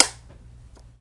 My first experimental attempt at creating impulse responses using a balloon and impact noises to create the initial impulse. Some are lofi and some are edited. I normalized them at less than 0db because I cringe when I see red on a digital meter... after reviewing the free impulse responses on the web I notice they all clip at 0db so you may want to normalize them. They were tested in SIR1 VST with various results. A click from a toy car appendage that isn't really an impulse but works.
convolution, reverb, ir, response, impulse